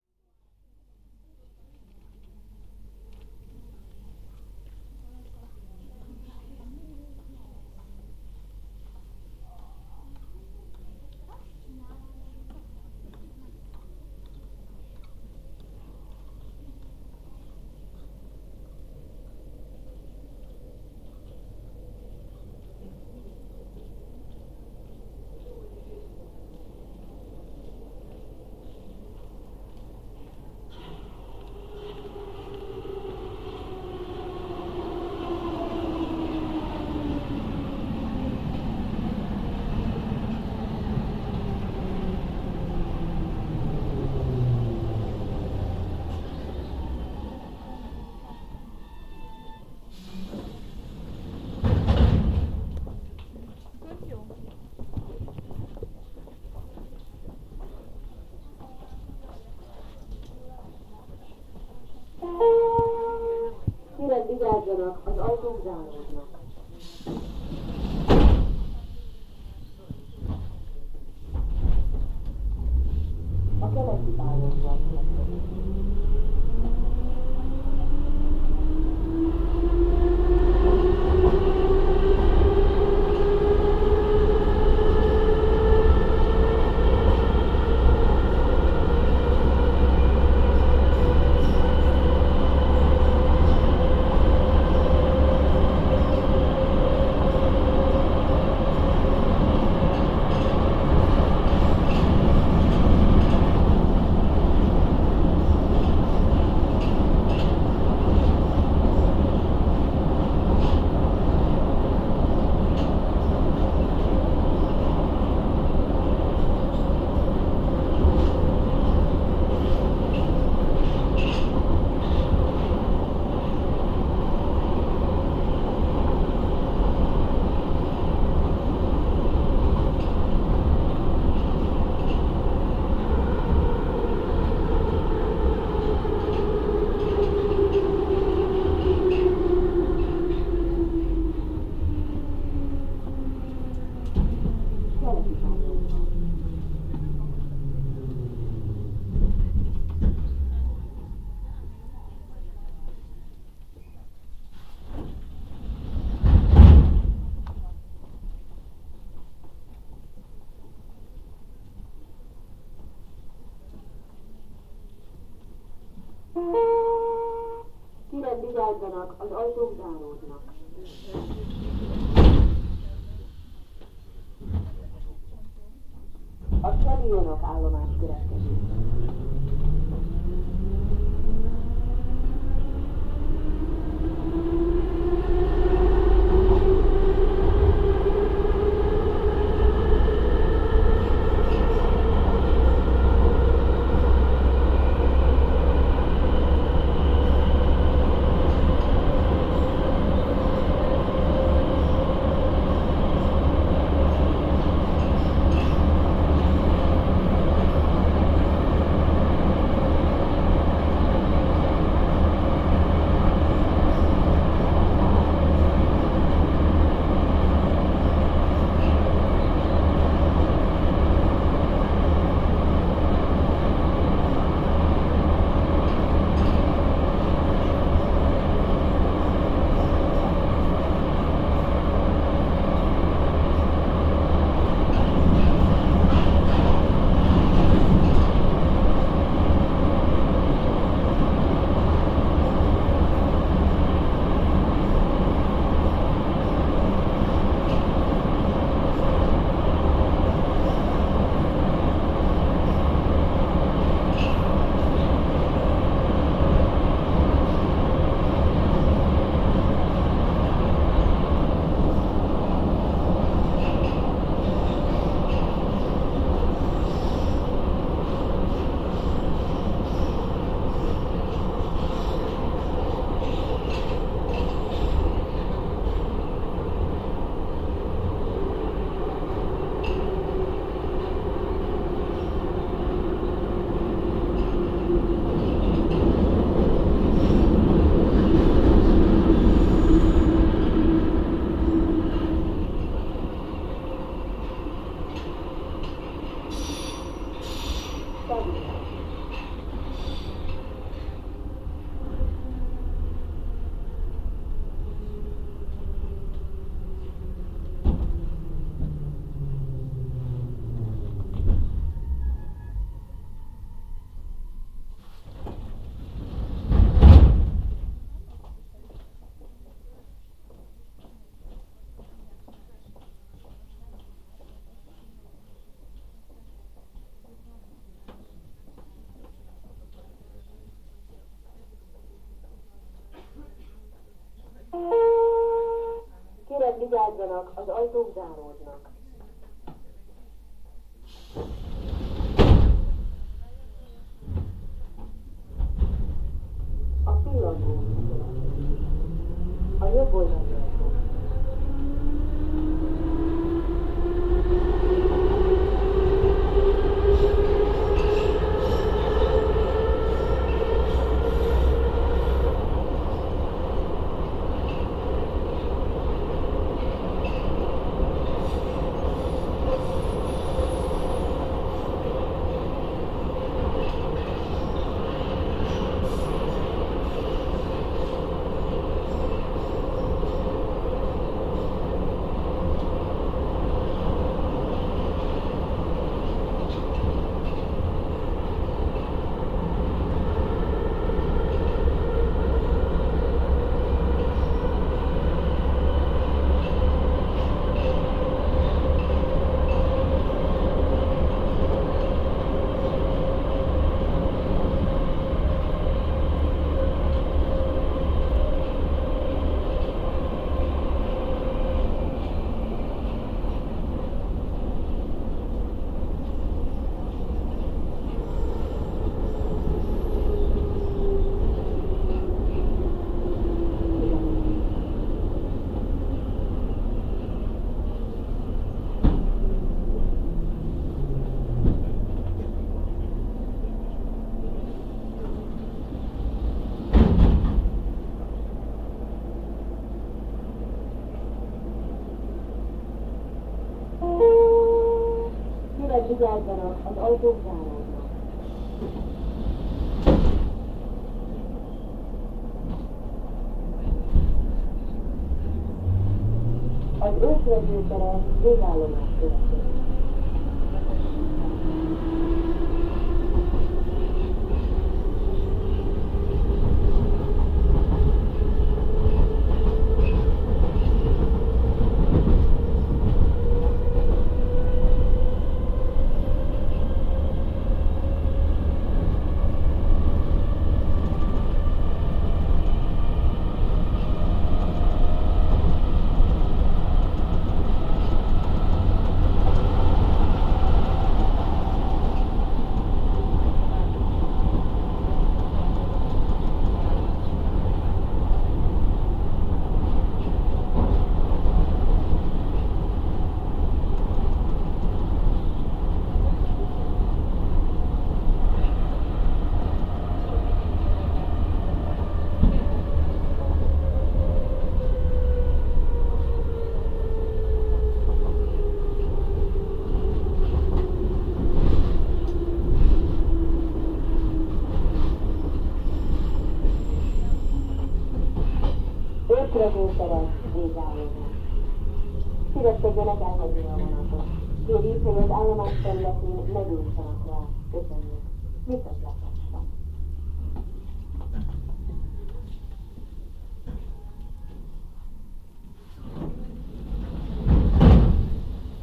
Budapest Metro line 2. from Blaha Lujza tér to Örs vezér tere (five stations). Ambiance sounds were recorded by MP3 player during the trip.
vehicle, field-recording, transport, horror, motor, thriller, subway, budapest, metro, underground, train